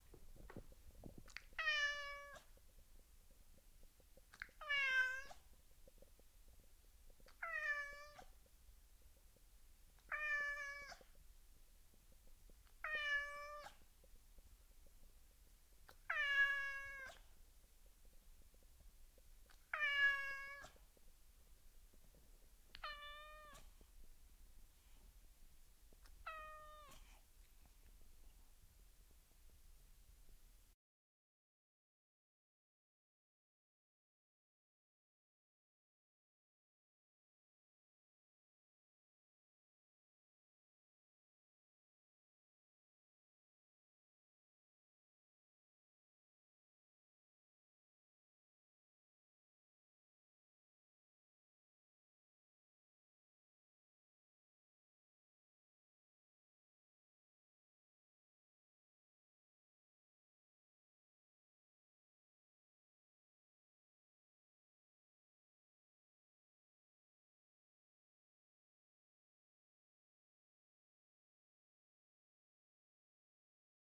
Cat Meow
recorded above the cat but kept mic infront of the cat's projection
indoor, OWI, recording